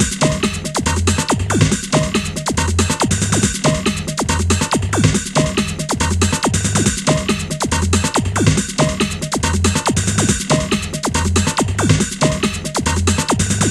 I've made this out of a few drum samples in FL Studio and placed a deep rolling bassline underneat it to make it flow.